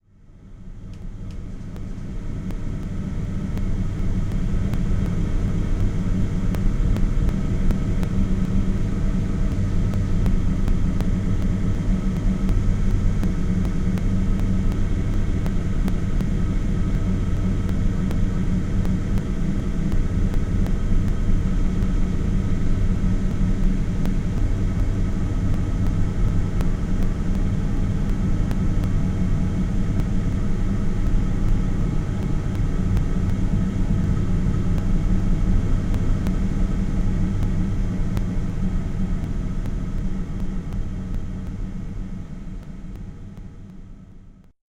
This recording, is the sound of a thermo tech mk II oil fueled waterheater, heating up water.
Recorded with a TSM PR1 portable digital recorder, with external stereo microphones. Edited in Audacity.
waterheater, water, shell, oilfueled, heat